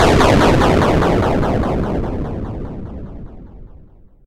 Retro, Explosion 04
Retro, explosion!
This sound can for example be triggered when a target is destroyed - you name it!
If you enjoyed the sound, please STAR, COMMENT, SPREAD THE WORD!🗣 It really helps!
retro, bomb, blow-up, death, game, blow, detonate, explode, destroy, boom, explosion, up, demolish, destruct